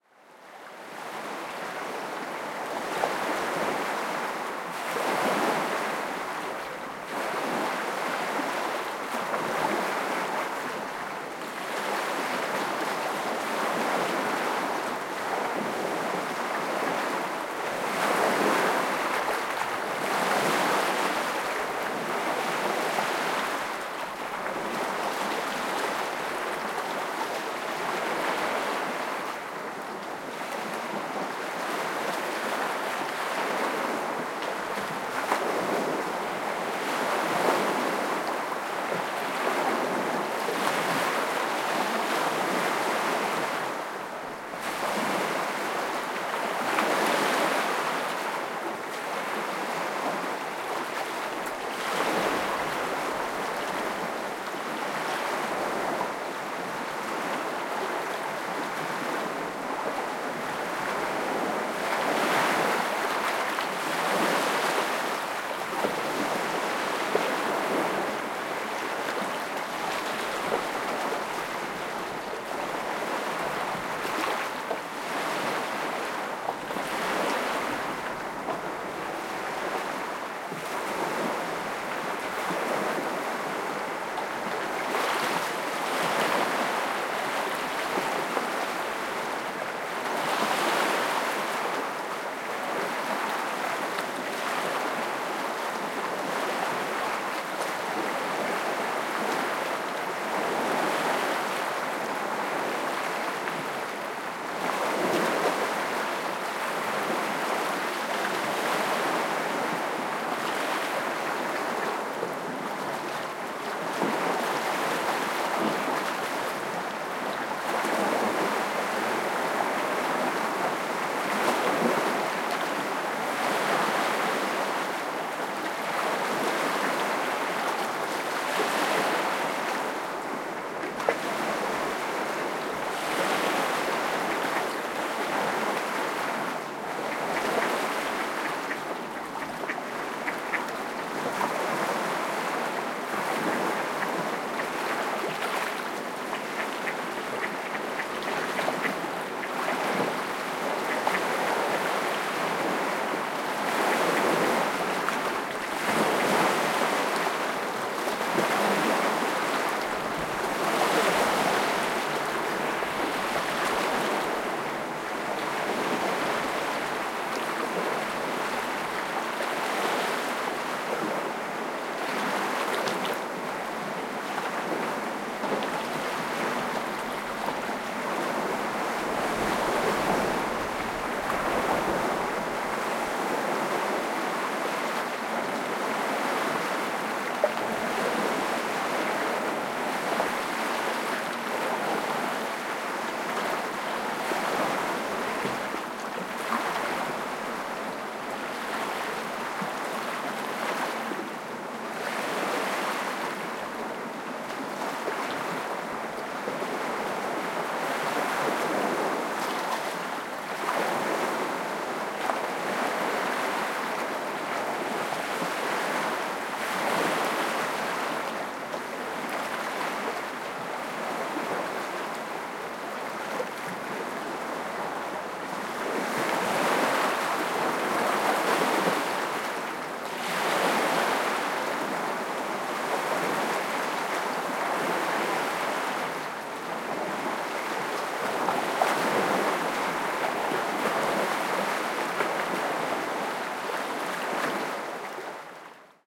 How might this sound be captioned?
Waves on shore of lake maggiore
Recorded under small bridge going into water. Waves break on a small beach at lake maggiore.
Recorded in Ticino (Tessin), Switzerland.